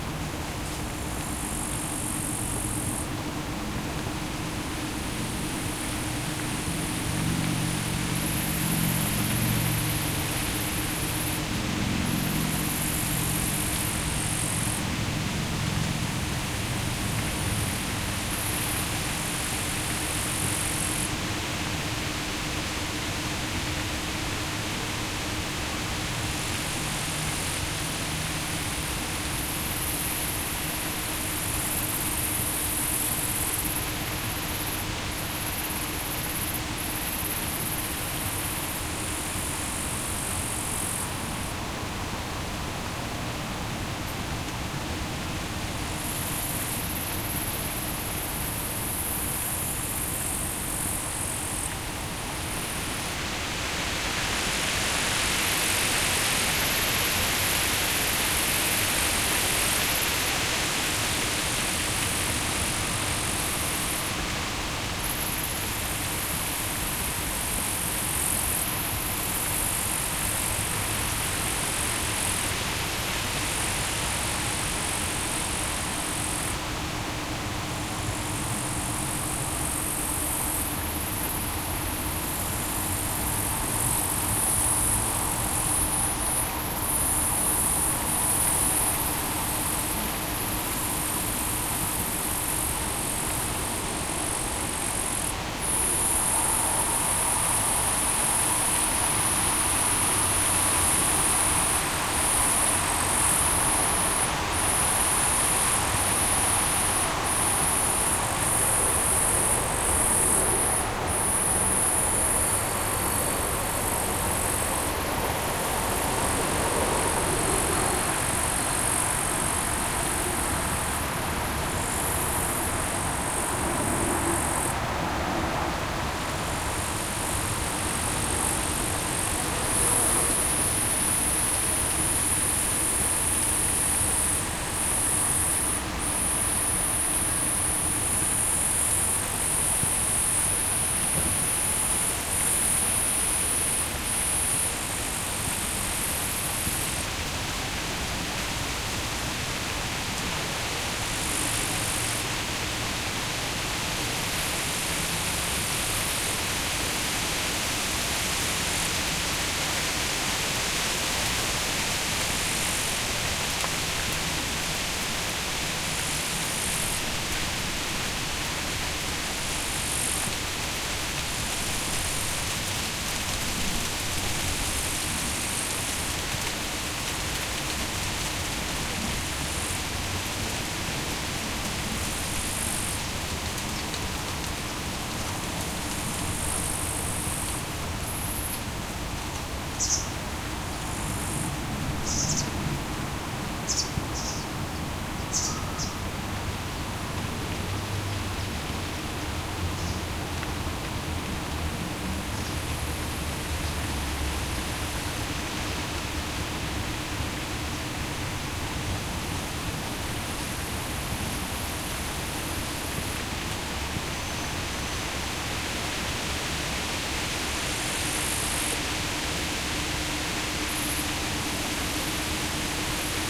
field in september
This recording was cut short of someone phoning me on my mobile.
I had placed the Olympus LS-10 recorder underneath a popular tree near a field and a river.
summer, field-recording, wind